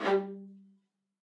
One-shot from Versilian Studios Chamber Orchestra 2: Community Edition sampling project.
Instrument family: Strings
Instrument: Viola Section
Articulation: spiccato
Note: F#3
Midi note: 55
Midi velocity (center): 95
Microphone: 2x Rode NT1-A spaced pair, sE2200aII close
Performer: Brendan Klippel, Jenny Frantz, Dan Lay, Gerson Martinez
single-note, midi-note-55, strings, fsharp3, multisample, spiccato, viola-section, midi-velocity-95, viola, vsco-2